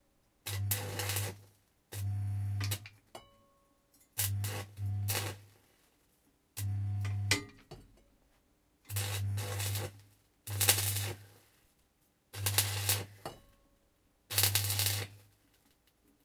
Welding 1 short bursts
Welding sounds made by welding with the electric current.
noise, weld, jump, welding, electrode, electric, welder, metal, spark, power, work, powerup